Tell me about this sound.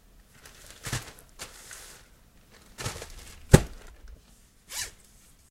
Tent packing
The sound of packing up bags and stuff into a tent, then closing it.
Zip, Tent, Camping